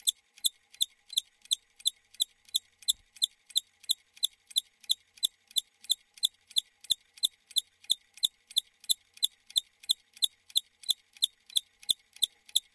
Queneau machine à coudre 04
son de machine à coudre
coudre, industrial, machine, machinery, POWER